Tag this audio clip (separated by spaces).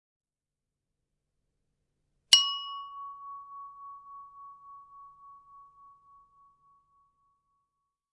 ding bell-tone bell ring chime ping